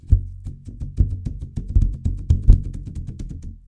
Masking-Tape2
Percussing a stripe of masking tape, recorded with Neumann TLM103
masking, percussion, transients, kick, bass, low, drum, tape